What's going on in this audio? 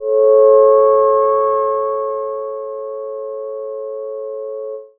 minimoog vibrating C-5
Short Minimoog slowly vibrating pad
pad, minimoog, short, vibrato, moog, slowly-vibrating, synthetizer, synth, short-pad, electronic